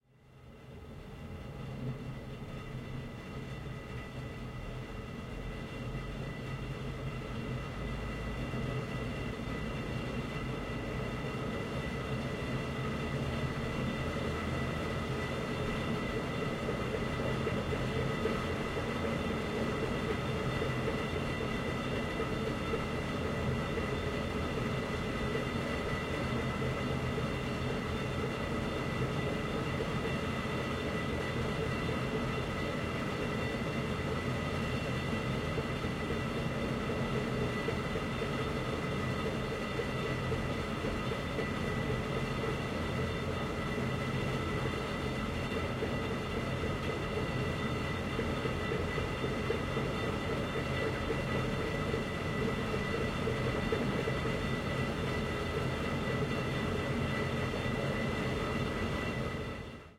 Funicular cable car
Recording of the funicular that goes from Locarno to The Madonna del Sasso.
Recorded in Ticino (Tessin), Switzerland.
cable, car, engine, field-recording, fieldrecording, funicular, ropeway, switzerland, tessin, ticino, vehicle